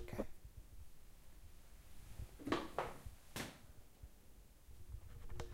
Sitting down in a plastic chair on hard floor.